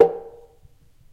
ATIK 2 - 31 stereoatik
BONK AGAIN These sounds were produced by banging on everything I could find that would make a sound when hit by an aluminium pipe in an old loft apartment of mine. A DAT walkman was set up in one end of the loft with a stereo mic facing the room to capture the sounds, therefore some sounds have more room sound than others. Sounds were then sampled into a k2000.
percussion, household, acoustic